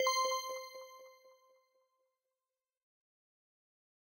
success bell
A success, win sound.
bonus, cartoon, bell, sparkle, game, win, success